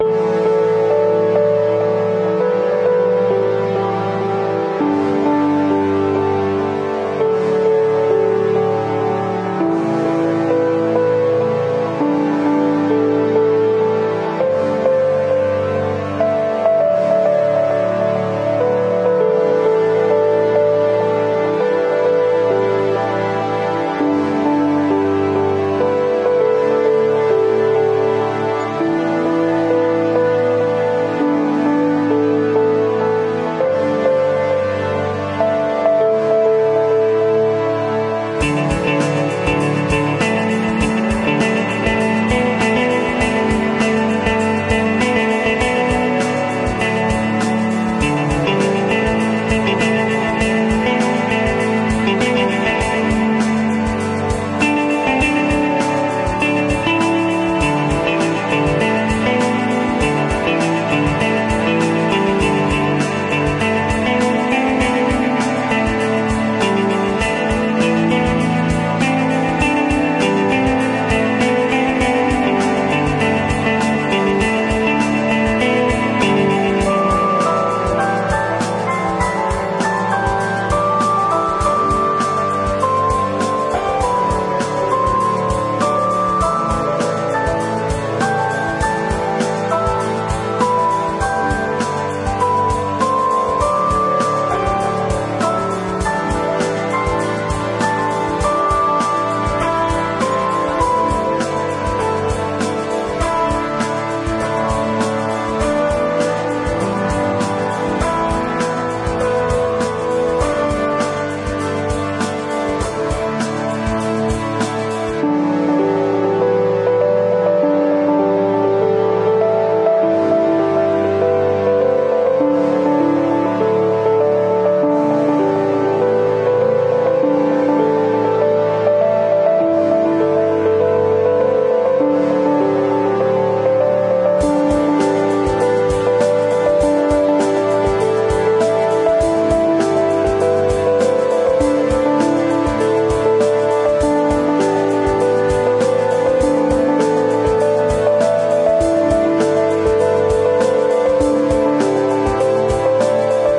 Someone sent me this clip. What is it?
Close To The Mystery (loop)
A small epic loop theme suitable for a game soundtrack or a dynamic movie scene :)